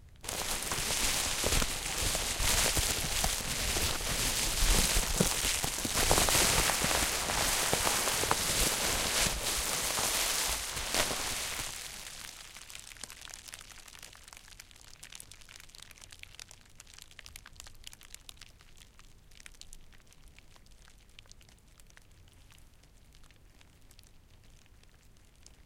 rustle.bub-Wrap Unravel 2
recordings of various rustling sounds with a stereo Audio Technica 853A
rustle, scratch, bubble, rip, bublerap